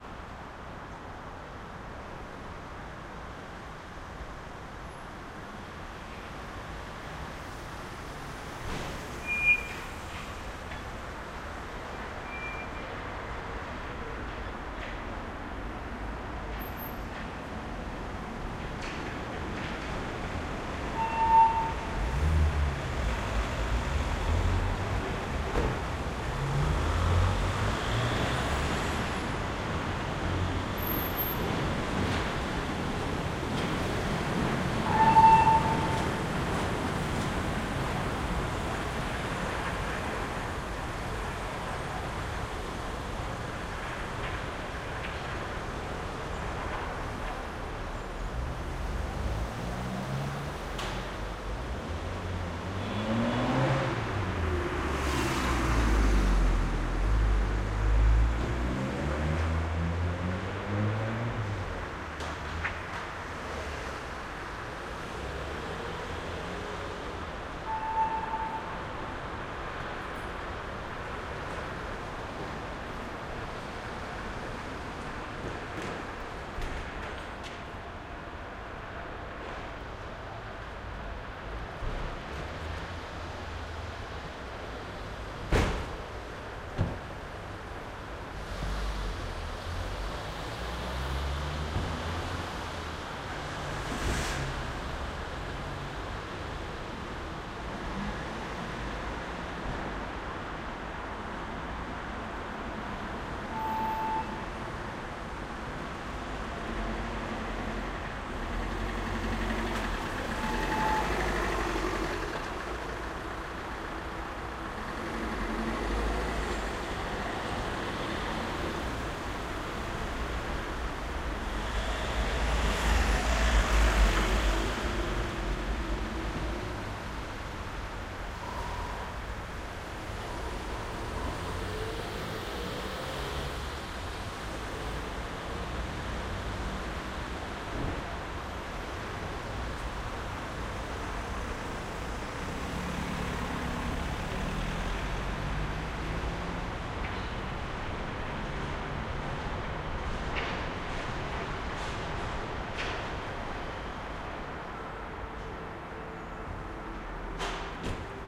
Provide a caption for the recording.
12 atmo-wuppertal-schwebebahn02
City ambience recorded at Wuppertal under the Schwebebahn monorail.
Wuppertal, urban, traffic, Schwebebahn, city, ambience